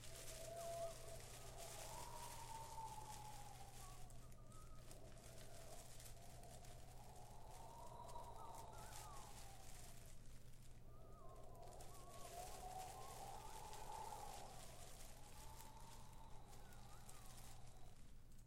Fake windy noise with trash blowing in the background, good for an ally or something along those lines.
Windy, nature, trash, Noise, blowing